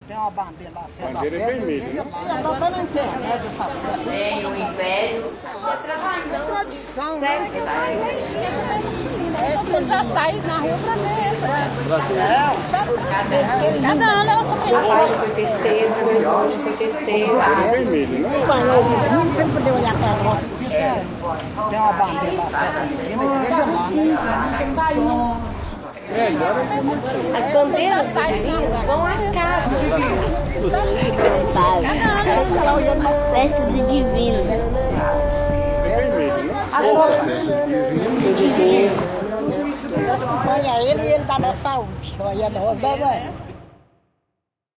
4propri8 vozes
Voices recorded during a religious street party in a small village in Brazil called Diamantina.
portuguese, voice, street